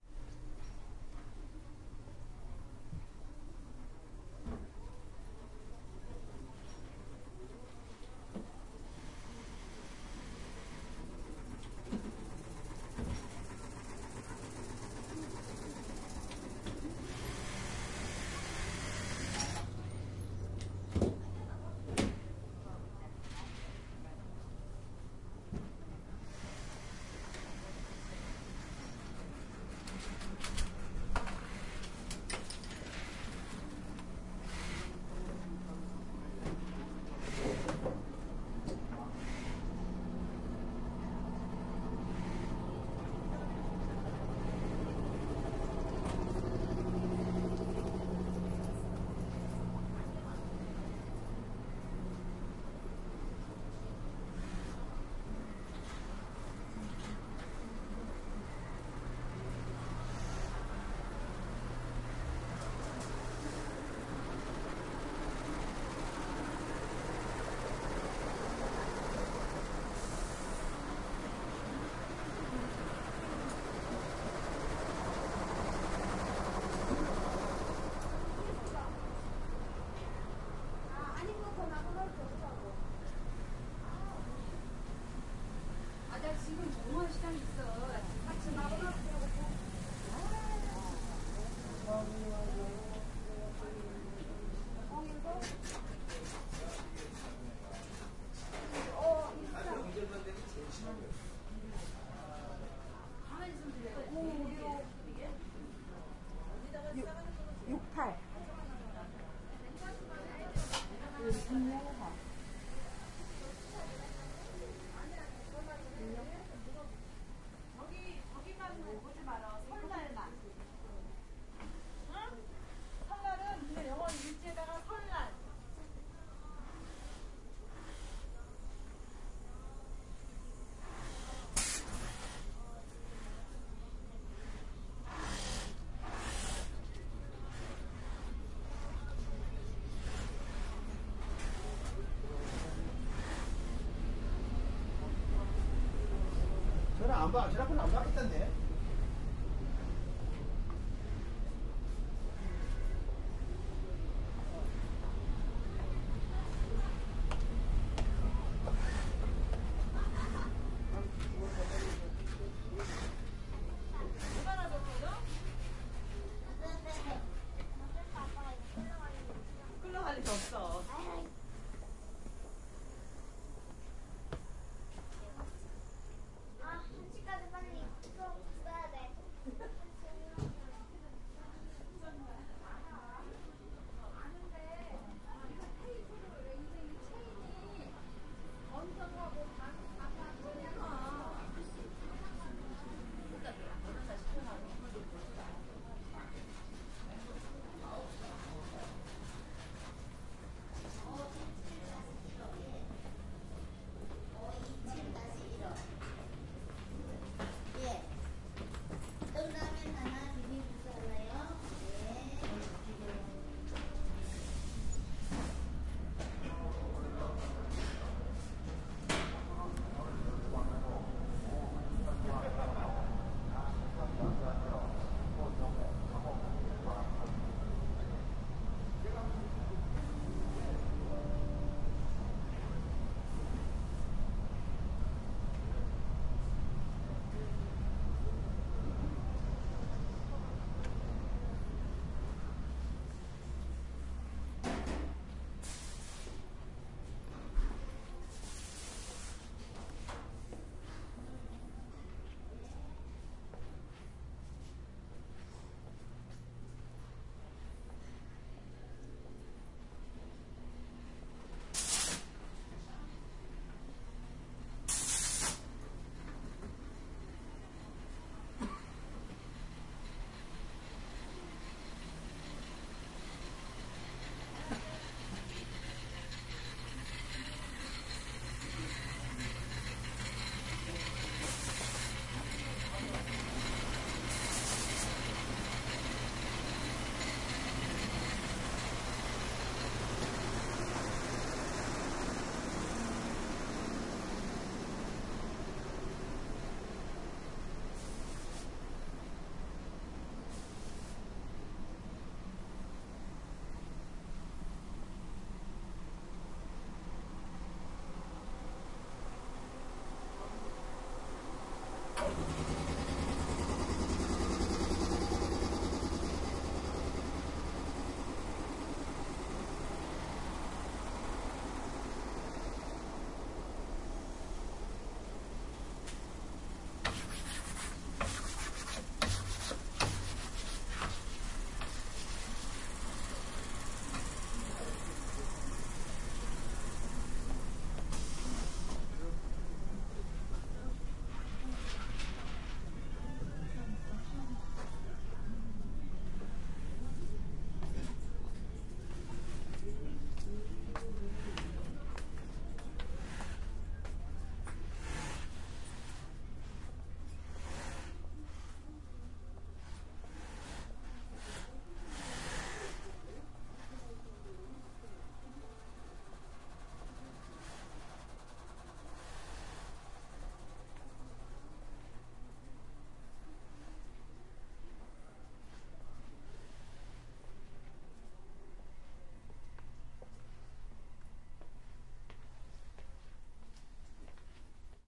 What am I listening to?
0124 Market clothes makers 2
People talking, Korean. Machines for clothes
20120121
field-recording, korea, korean, machine, market, seoul, voice